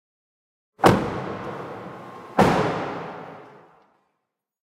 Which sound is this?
MITSUBISHI IMIEV electric car DOOR close
electric car DOOR close
electric, DOOR, car, close